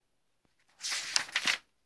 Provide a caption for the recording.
Small Page
Recorded while i was flipping Oxford dictionary :3
Book, Flip, Page, Paper, Sheet